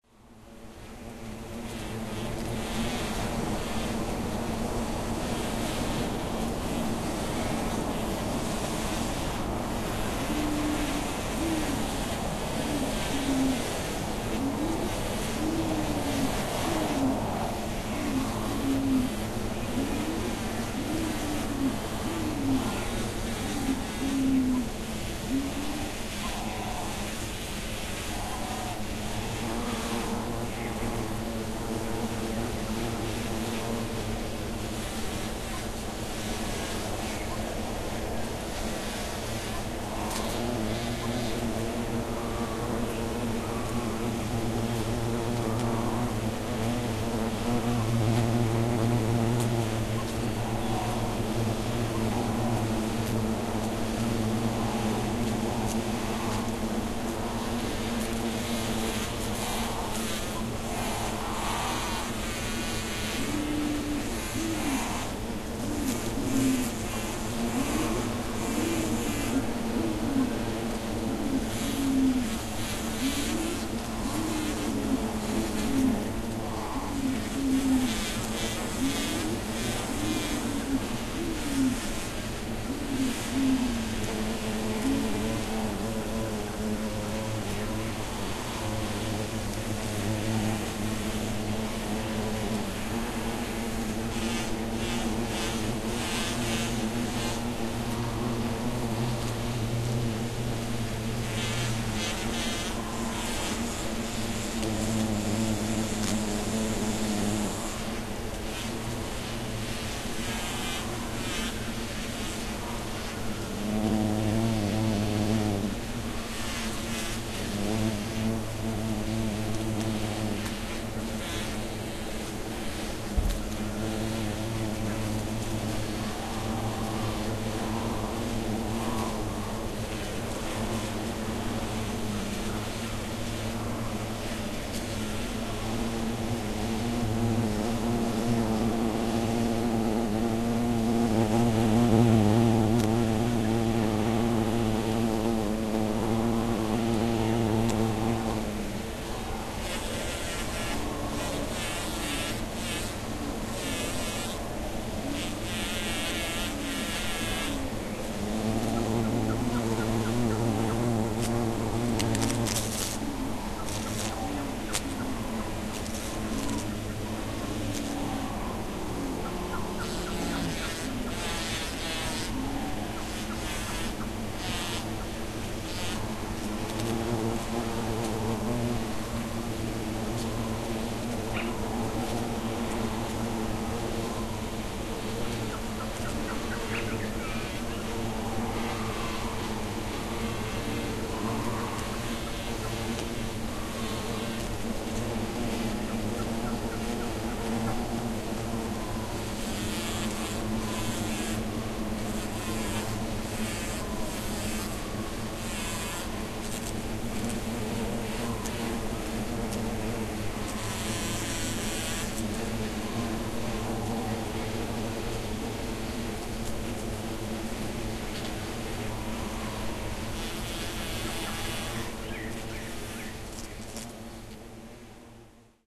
slow birds and bees
This is a recording of Bees slowed down